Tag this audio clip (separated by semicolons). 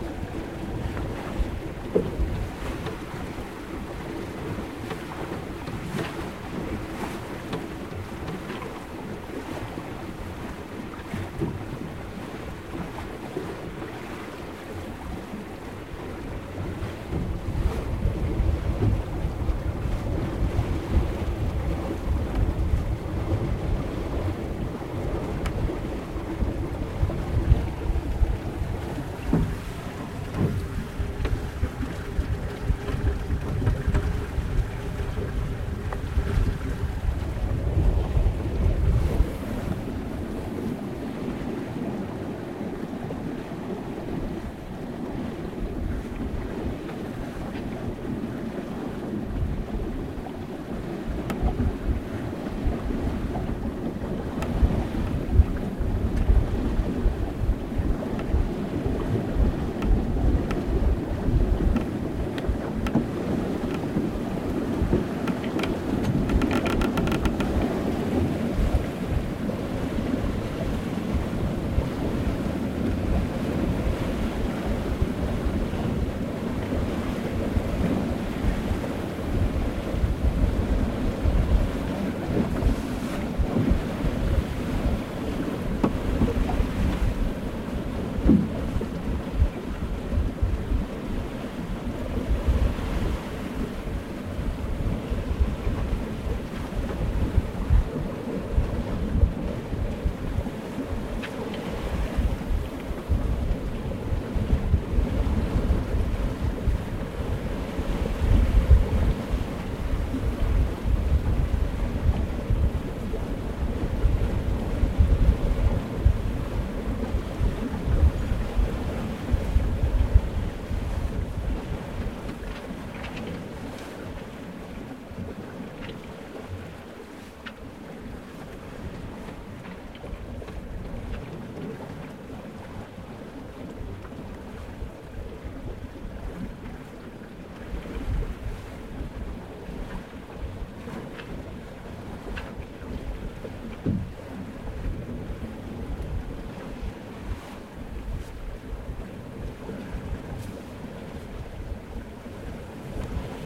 field-recording; ambient; sailing; sailboat